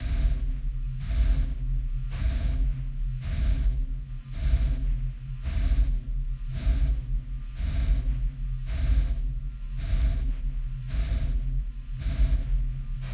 Horror Pulsating Drone Loop
Horror \ thriller breathing pulsating drone.
Perfectly looped.
ambience, dark, drone, horror, loop, low, pulsating, pulse, rumple, sci-fi, thriller